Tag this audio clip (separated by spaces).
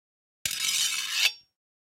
slide shield steel rod clang iron blacksmith metal shiny metallic